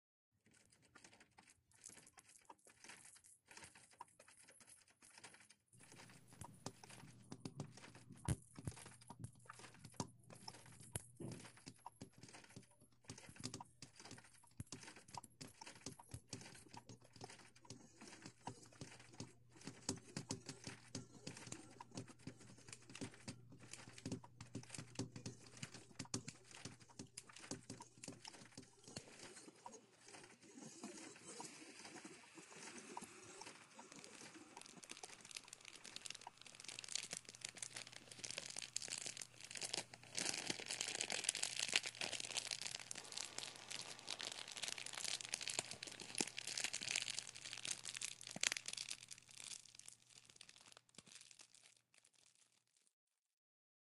ASMR Tapping
crinkle, pan, complex, ASMR, tap, tapping